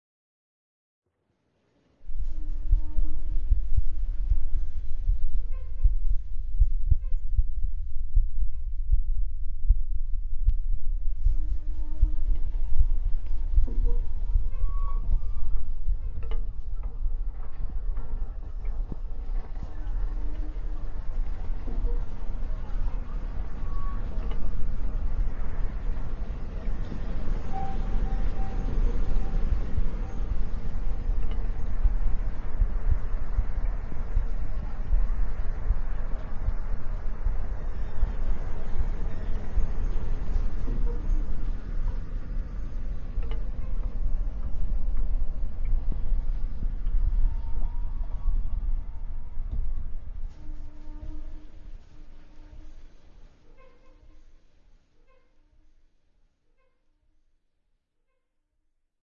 luis Insight
Sound recorded by Luís Fernandes, as part of his proposal for the workshop Hertziosfera.
Emulation of a sea or oceanic soundscape, composed by using a variety of sounds recorded at the Jaume Fuster library, Barcelona.
gracia-territori-sonor, gts, hertziosfera